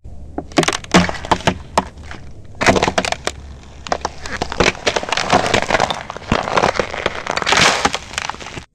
Ice 8 - Slow
Derived From a Wildtrack whilst recording some ambiences
sound, BREAK, ice, winter, freeze, step, crack, footstep, frost, cold, foot, frozen, field-recording, effect, walk, snow